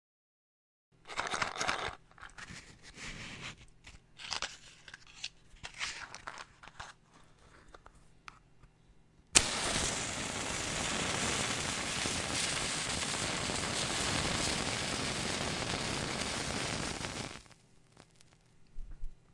Light match
Recording of a match strike after shacking the box.
dynamic, recording, sound, Studio-recording